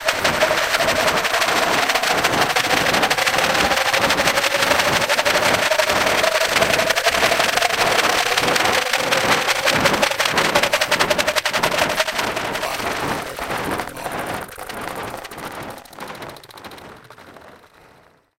space rattle
Live looped small skin shaker fx manipulated in Audiomulch.
shaker, rattle, fx, audio-design, percussion